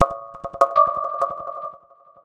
bell/vibe dubs made with reaktor and ableton live, many variatons, to be used in motion pictures or deep experimental music.